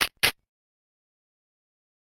A reload sound effect for use in a video game.
effect, game, nes, reload, snes, sound, video